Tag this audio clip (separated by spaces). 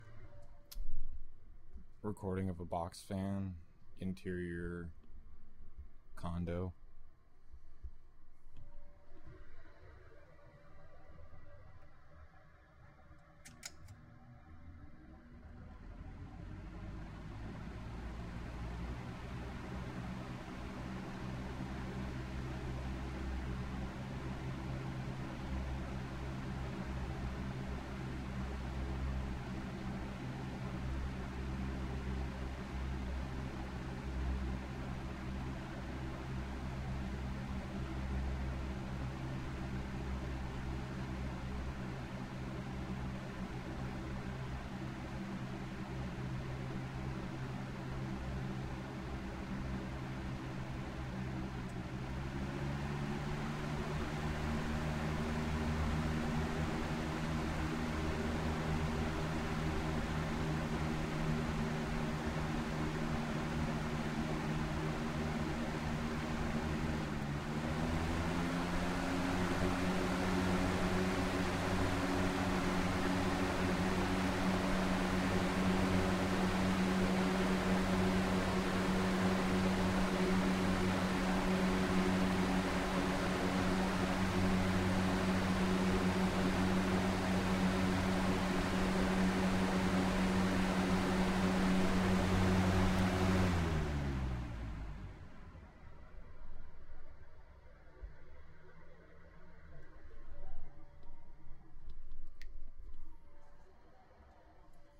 Ambiance Box fan motor